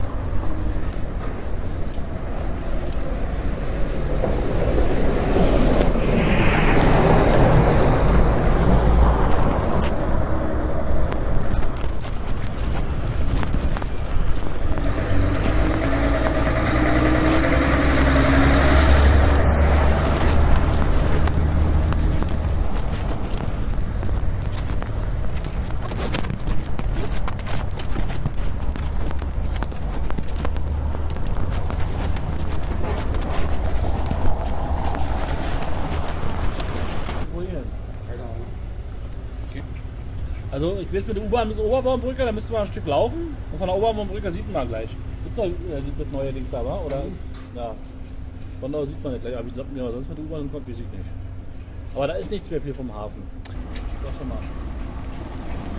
recorded at behala westhafen - an old harbor in berlin wedding. in the end of the file u can hear a man talking about the former brother harbor in berlin treptow, which is now part of the media spree- like universal- mtv and others
berlin, soundz, geotagged, metropolis